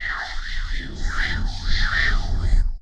Horror SFX 6
Another one
Recorded with an INSIGNIA Microphone by crumpling a wrapper by it and editing with Audacity
effects, horror, whispers